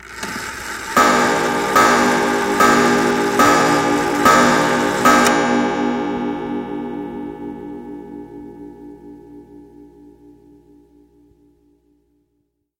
6 chimes of a clock